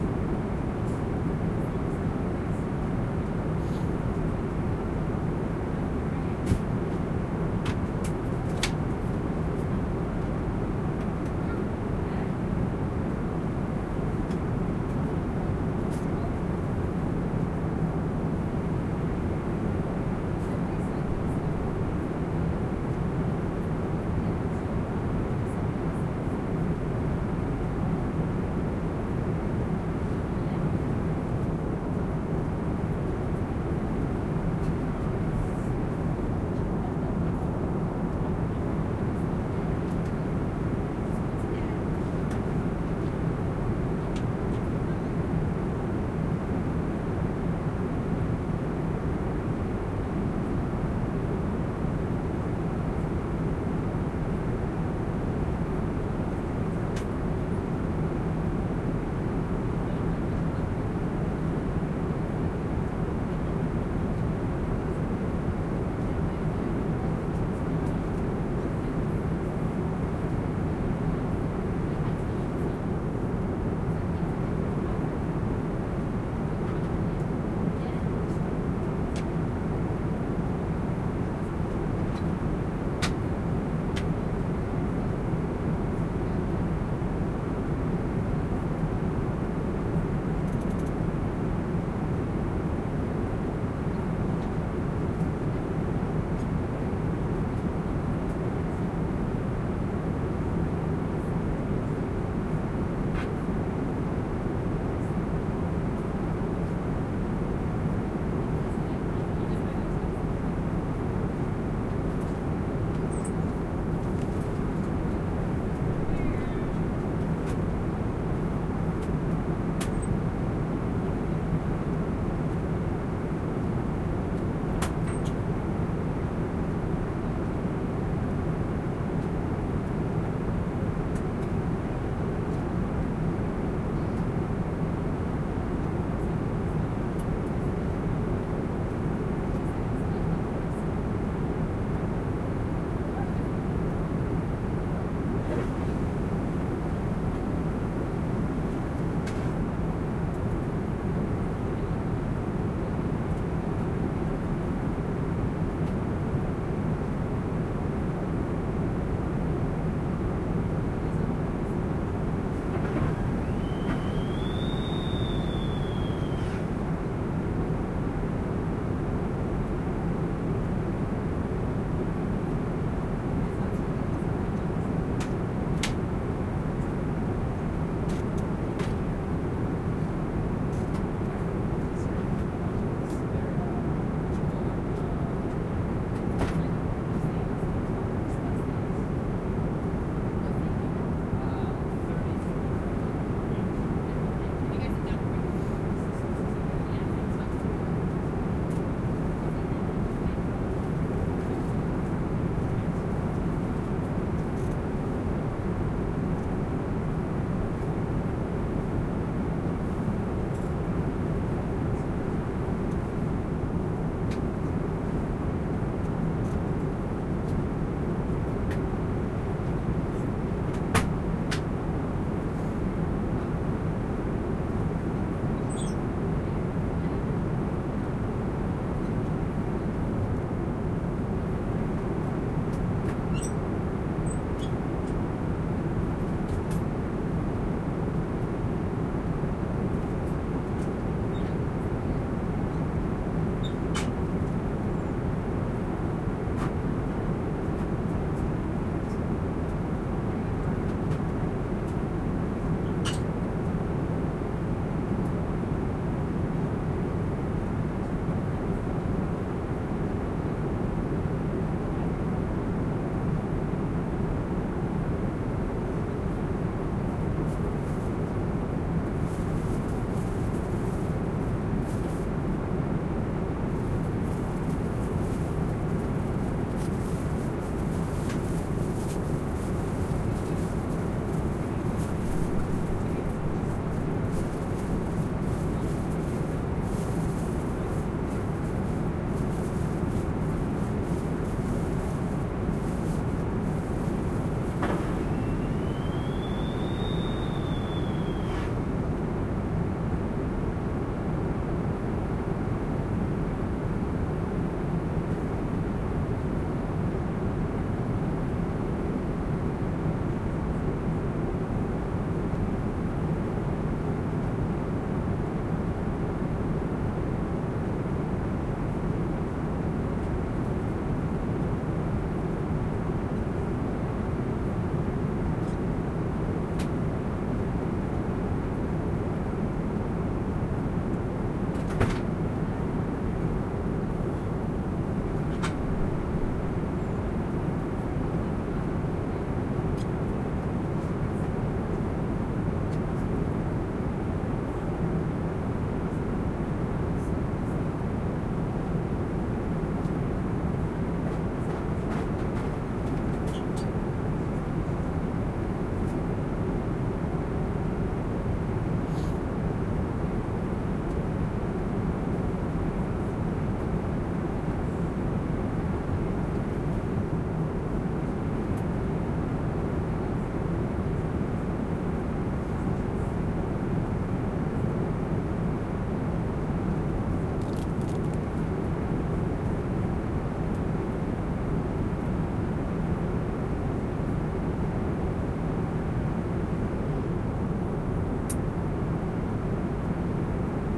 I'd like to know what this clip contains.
Plane Cabin
Inside the cabin of a large passenger jet mid flight. Lots of engine and air noise obscuring the sounds of people talking eating drinking.
background, jet, aircraft, plane, inside